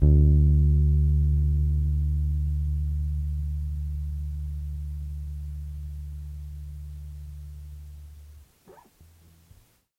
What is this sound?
Tape Bass 7
Lo-fi tape samples at your disposal.
bass, collab-2, Jordan-Mills, lo-fi, lofi, mojomills, tape, vintage